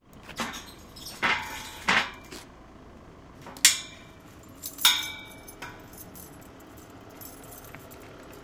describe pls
Squeak, Engine and Metal
A metal squeak and rubber and grainy gravel step sound accidentally (creatively) produced while parking a bicycle.
Recorded with Zoom H2. Edited with Audacity.
berlin, bicycle, bike, city, click, cycling, ding, dong, fahrrad, gravel, metal, night, shaking, shaky, strange, vehicle, weird